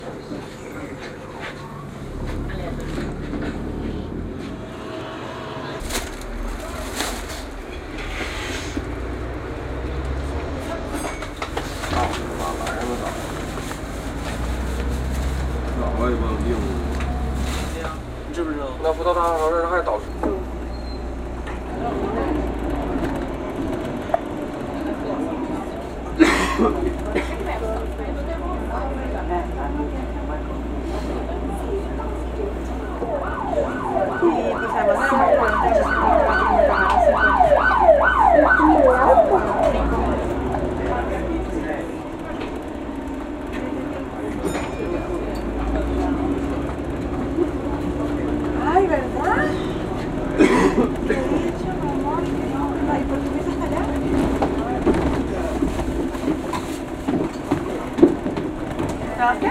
London Inside Red Bus
London, inside a red bus. Voices, bell sounds, engine sound, rattling, traffic noise.